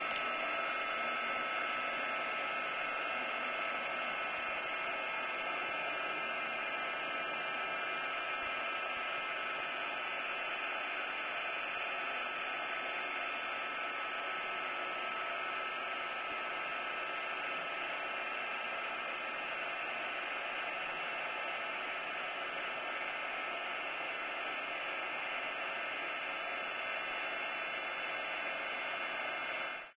Contact mic recording